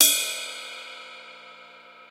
maypex-CBL bellride
AKG mic into M-Audio external sound card and USB into laptop.
Maypex drum kit, zildjian ride cymbal hit on the bell.
kit, drums, cymbal, hits, field-recording, zildjian-cymbal, ride, zildjian, bell-ride